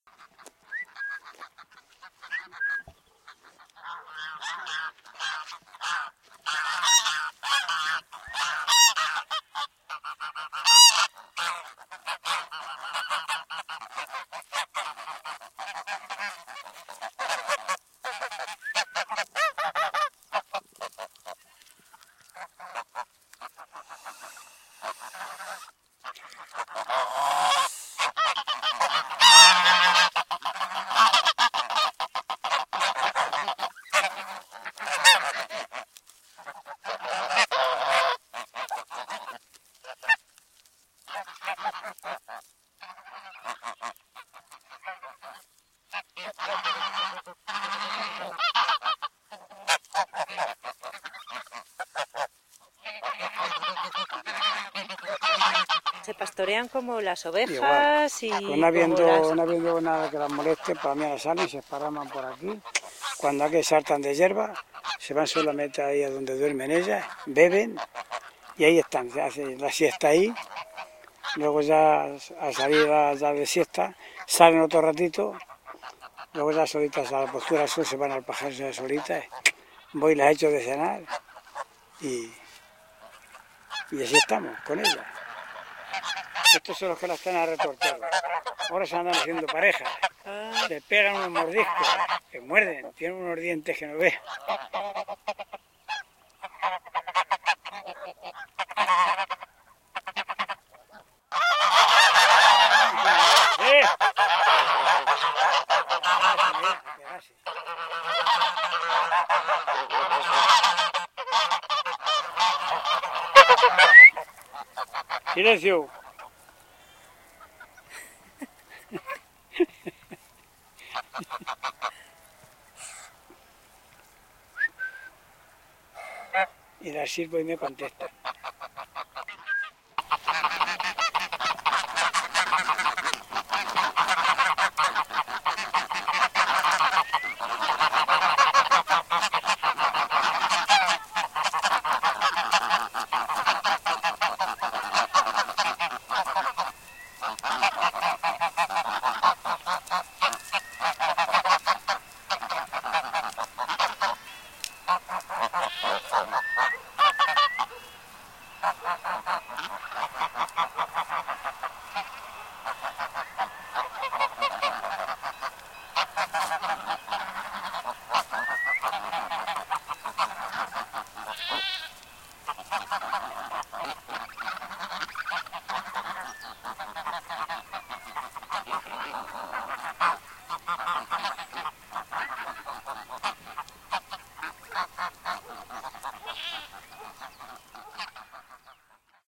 Graznido de Ocas, pastor en la pradera de El Egido.
Geese honking, gooseherd in the meadow of El egido
Grabado/recorded 30/10/14
ZOOM H2 + SENNHEISER MKE 400